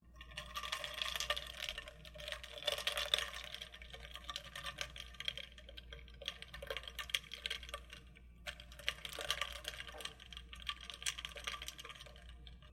MirandaEppStirring ice in a cup
stirring ice in a cup
cooking, kitchen, music152